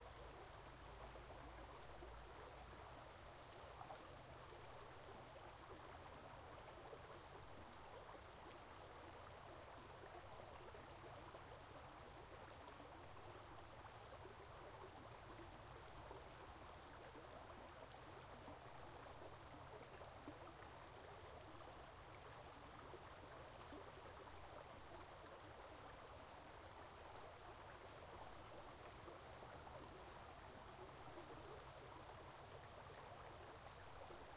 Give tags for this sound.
stream; running; water

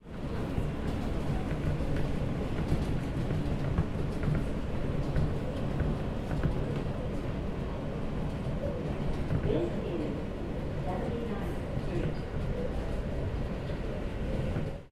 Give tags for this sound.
subway; PA; walla; nyc; system

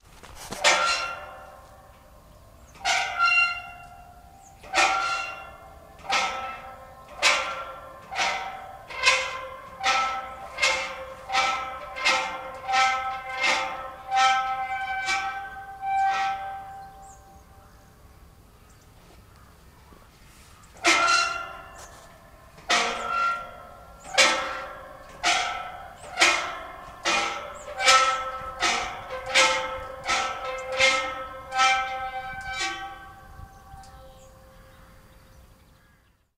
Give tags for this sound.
sqeaking; gate; hinge

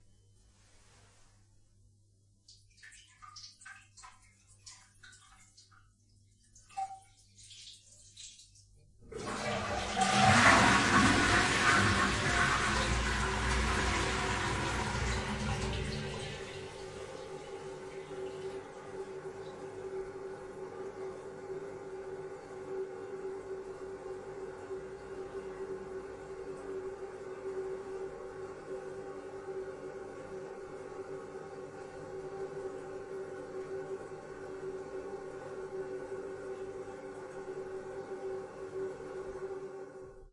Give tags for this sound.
drip; OWI; water

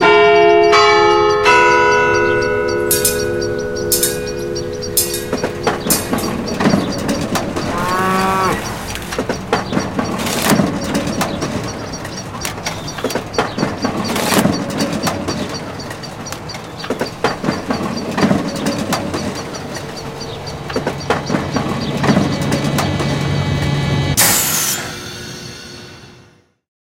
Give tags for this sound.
Kanaalzone; ring-tone; mix; ring; soundscape; phone; rural; alert; recording; industrial; Ghent

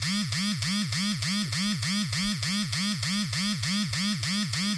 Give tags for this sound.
cellphone; buzzing; Huawei-Y6; mobile-phone; vibrating; vibration

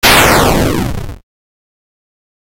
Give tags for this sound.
launch; retro

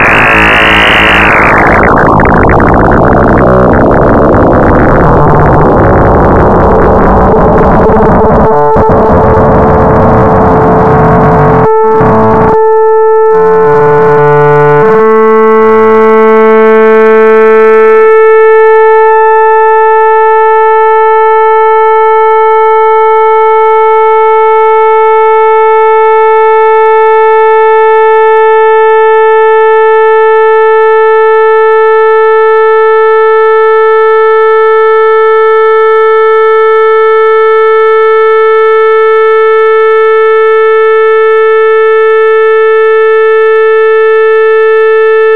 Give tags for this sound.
chaos; chuck; programming; sci-fi

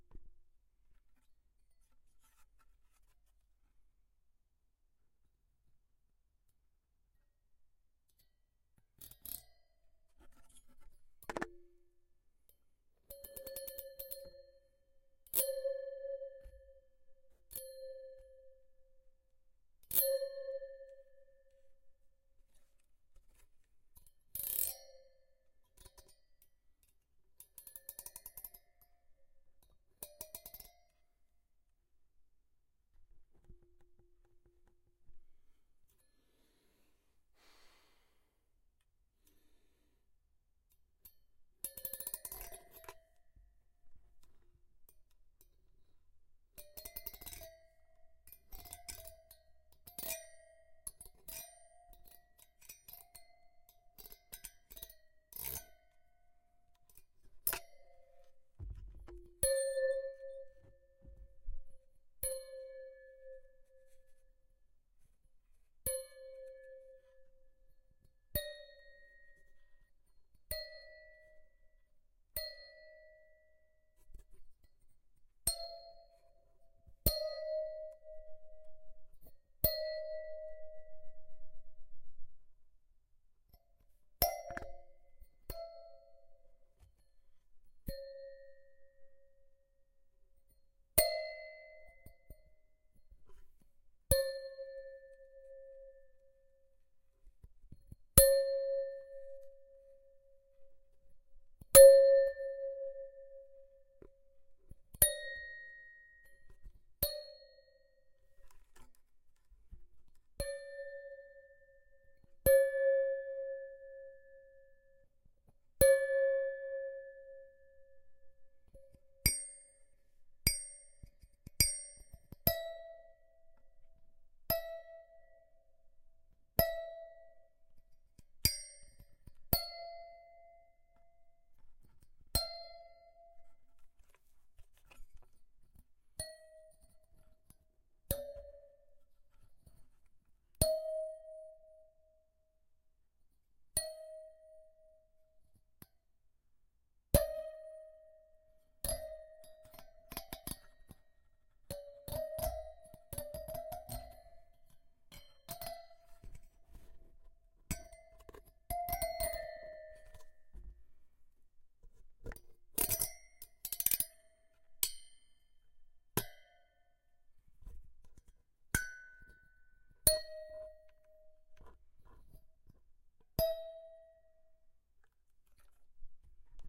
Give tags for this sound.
scary; waterphone; computer; eerie; hardware; dissonant; bell; videocard; creepy; chime; spooky; horror; plucked; ding

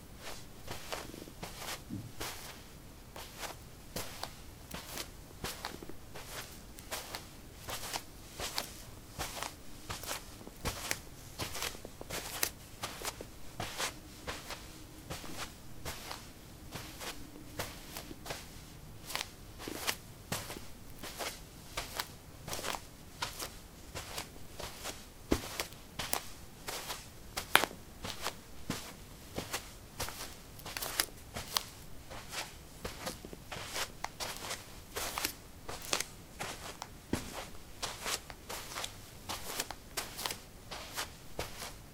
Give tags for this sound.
steps; footsteps; footstep

